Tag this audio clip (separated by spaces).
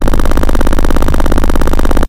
by minigun